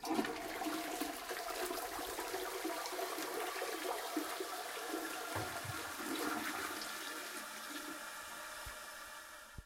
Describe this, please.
Flushing the toilet
Flush, Toilet, Bathroom